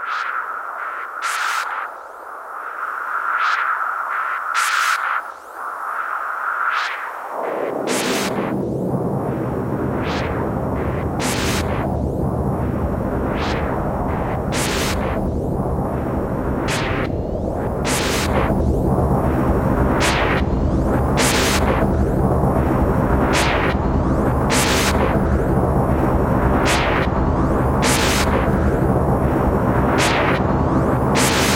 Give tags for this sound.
Noise
Distortion
Analog-Filter

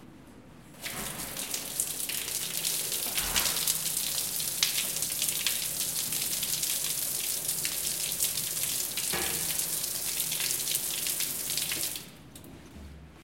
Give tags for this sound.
paisaje-sonoro
UEM
Universidad-Europea-de-Madrid

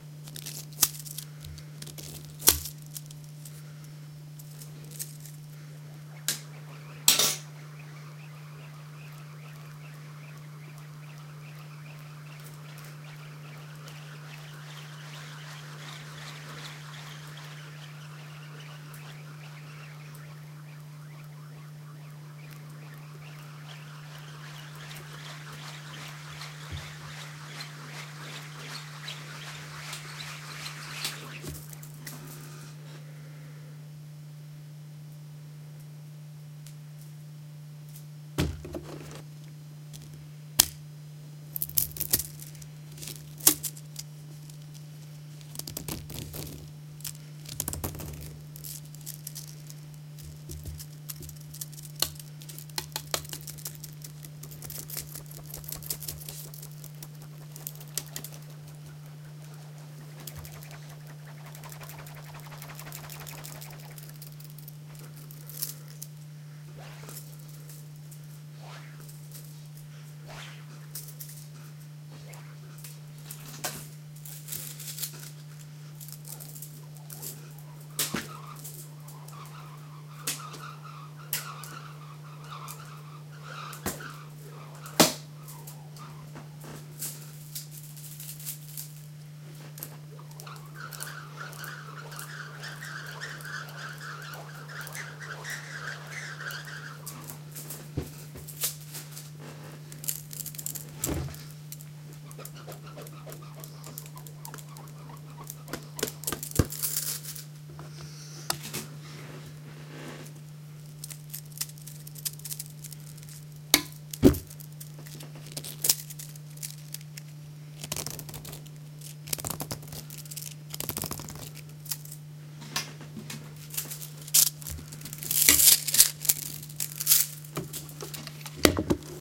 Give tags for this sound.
toy
request
woosh
stack
whoosh
wind
toys
slinky
clack
unprocessed

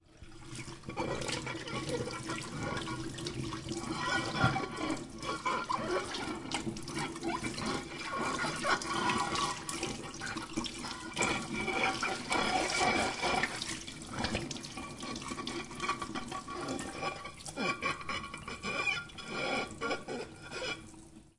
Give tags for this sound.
baking-dish
glass
kitchen
pan
percussion
pyrex

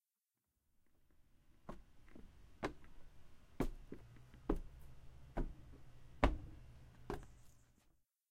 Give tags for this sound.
stairs
thump
aip09
stanford-university
walk
stanford
walking
wooden

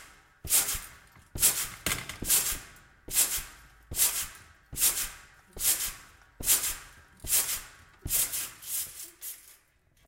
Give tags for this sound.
CZ
Czech

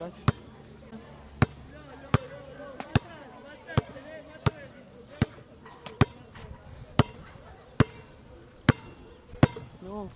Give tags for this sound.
basketball
bounce
bouncing

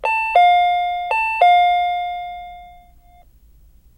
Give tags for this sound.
chime
close
closing
door
door-chime
gate
open
opening

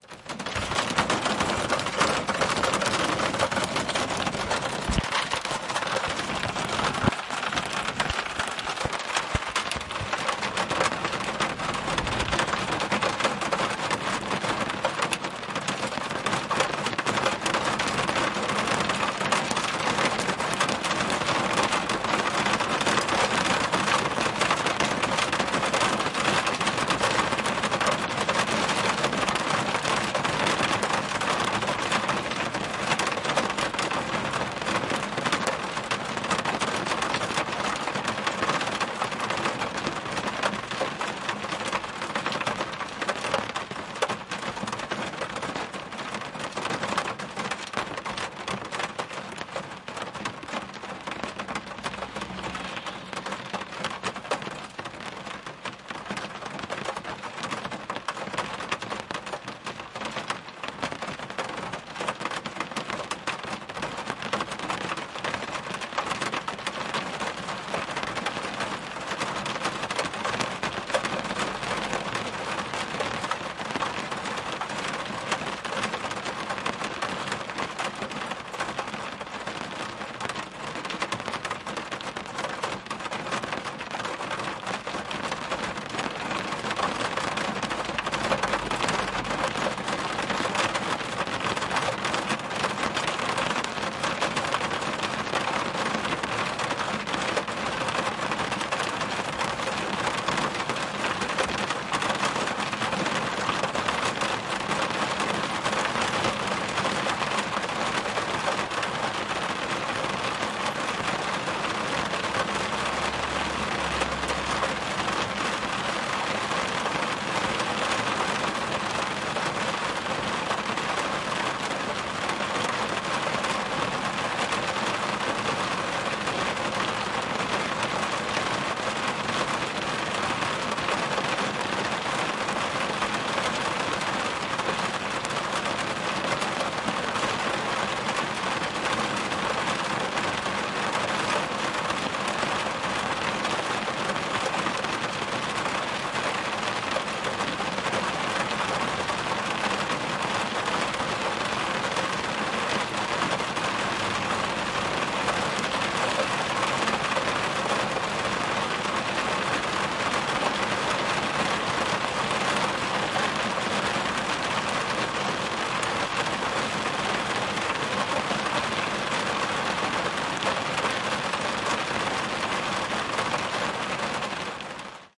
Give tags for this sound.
shure-mv88
car
raining
inside-car
rain

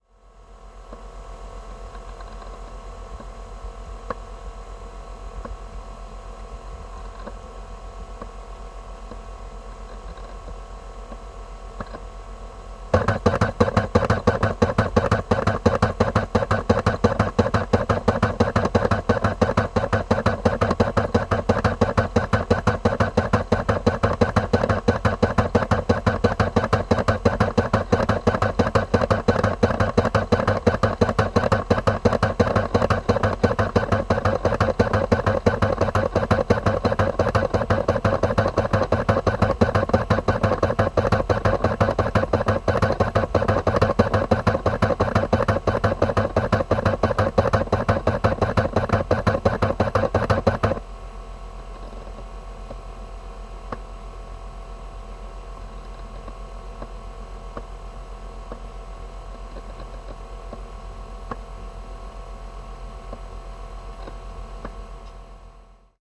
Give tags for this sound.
drive
cd
machine
disk
dvd

